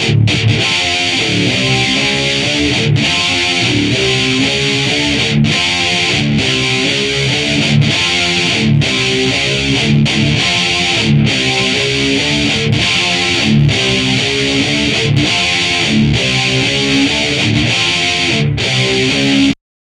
REV LOOPS METAL GUITAR 5
rythum guitar loops heave groove loops
groove, guitar, heavy, metal, rock, thrash